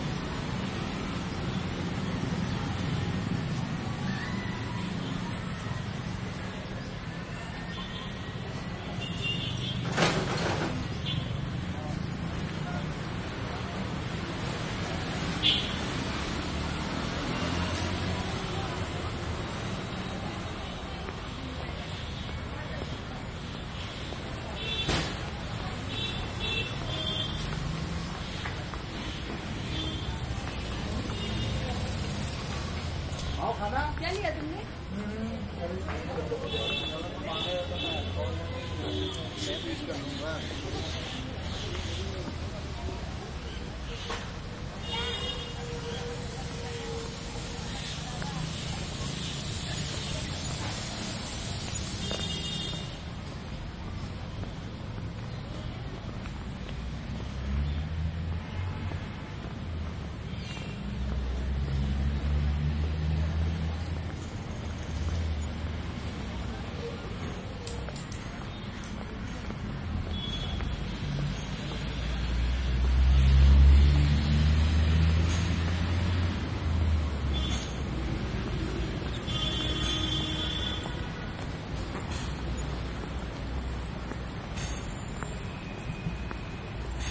-39 SE 4ch DELHI ATMO street traffic horns walk hindu voices bazaar
Recording from a bazar, probably near Bengali Market.
binaural, cars, field-recording, green-market, hindu, horns